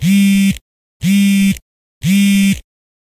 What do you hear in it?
cellphone vibrate hand hold loopable
Loopable recording of a Nexus 6 cell phone vibrating in my hand. Recorded with my Zoom H6.
alarm, buzz, cell, phone, vibrate